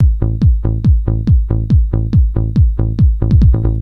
Short beat that loops nicely
Just a simple little thing i made in the free & open source DAW called LMMS.
It would be very fun if you left a comment on how you used this, I would be interested to hear.